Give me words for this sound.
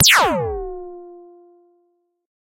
Retro Laser Gun